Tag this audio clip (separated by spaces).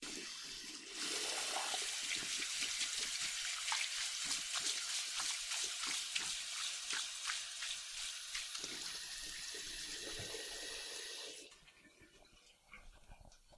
face face-wash wash washing water